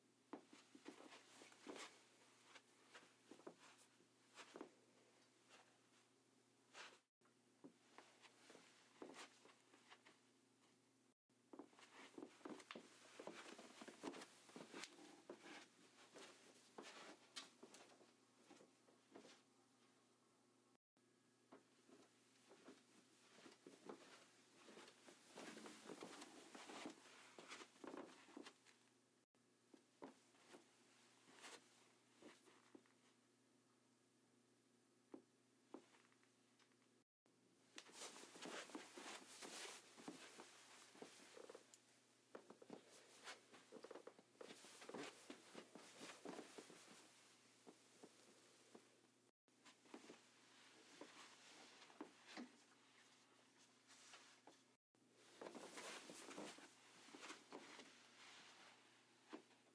Footsteps, Walking, Socks on Carpet
Several takes of walking past my microphone on carpet wearing socks
carpet, Footsteps, Walking